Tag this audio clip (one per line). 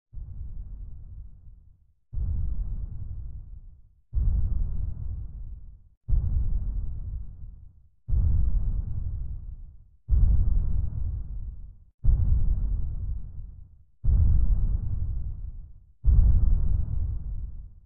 banging
creepy